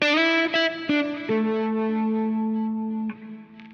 key jaz epi 3 oc 8
Short "octave" guitar sample made with my Epiphone Les Paul guitar through a Marshall amp and a cry baby wah pedal. Some reverb added. Part of my Solo guitar cuts pack.